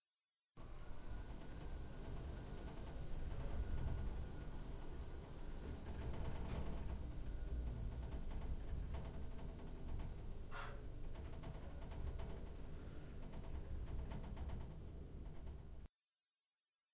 elevator-background
The backgound noise of an elevator.
background, campus-upf, elevator, lift, UPF-CS13